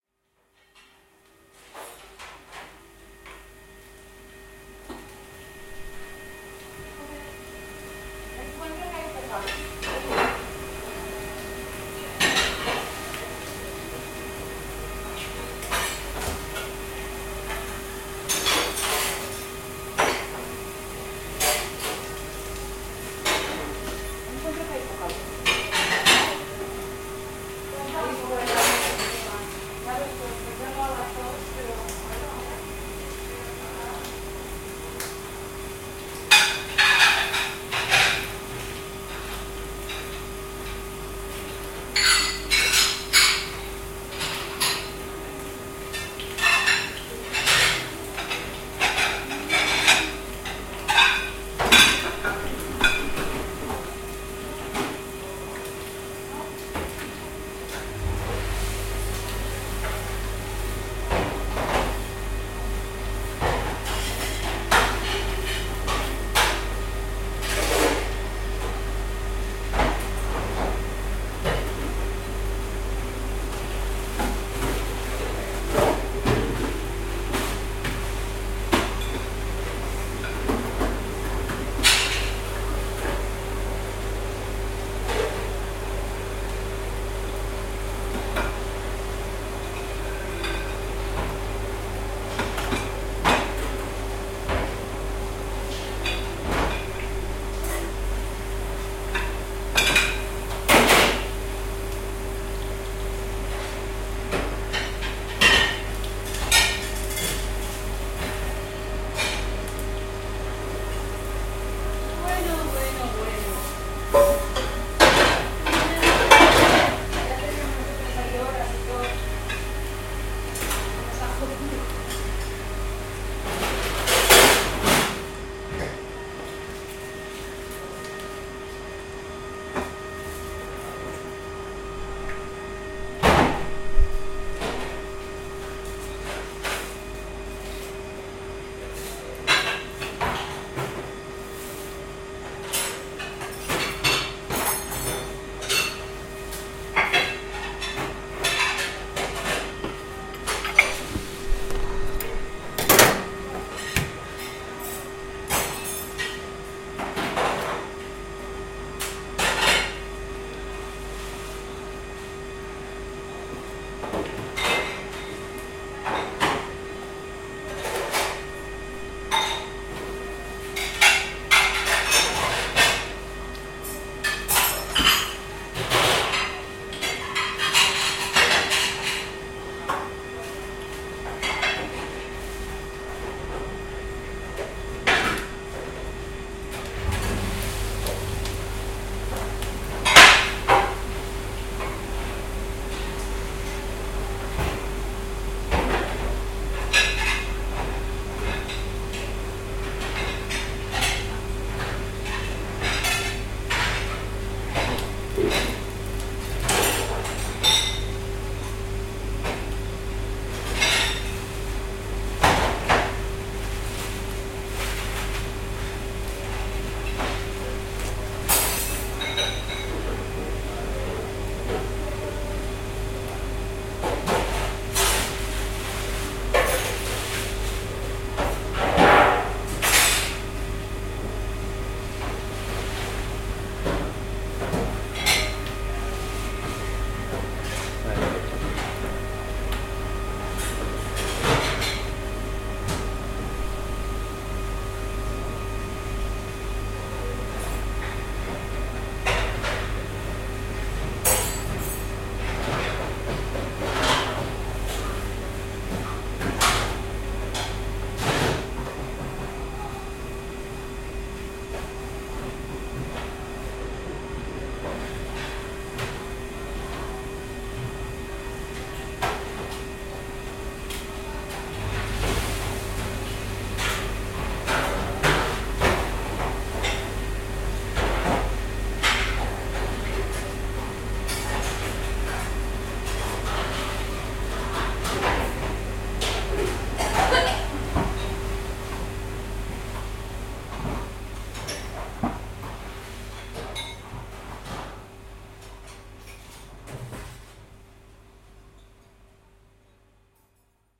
Ambient sound inside cafe kitchen
Soundscape recording from inside the kitchen of a cafe near the area of machinery, 13:00 to 14:00 h.